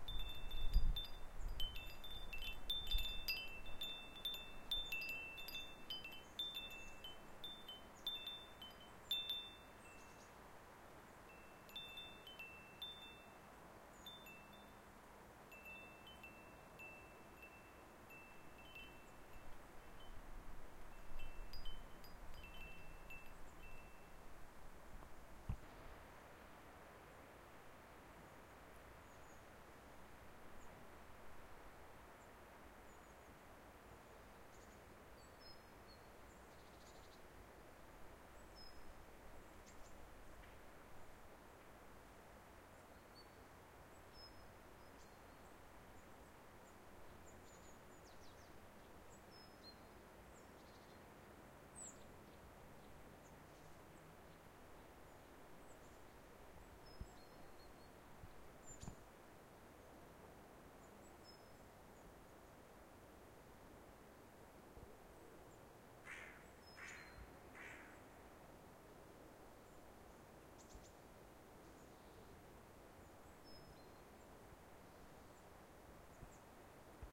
A small chinees windchime I recorded at a summer night